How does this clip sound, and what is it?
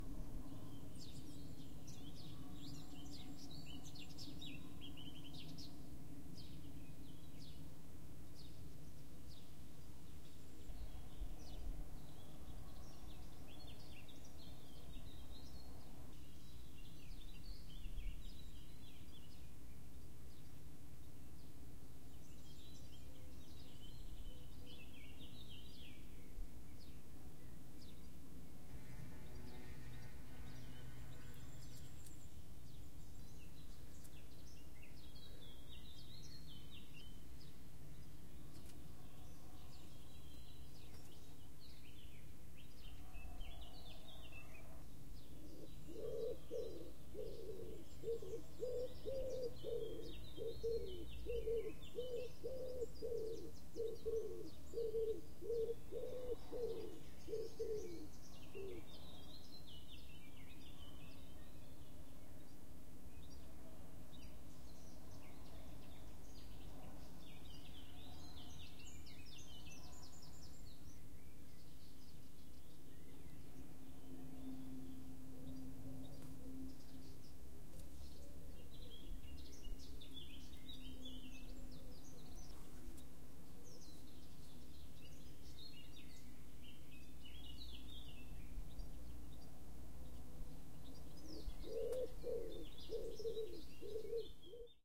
Recorded in Sant Pol de Mar Catalunya Spain with a TASCAM DR 40